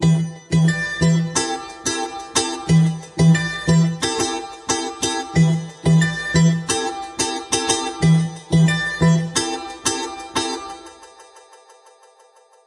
Hip Hop9 90 BPM
disco, hip-hop, pattern, background, trailer, broadcast, instrumental, rap, loop, club, sample, sound, stereo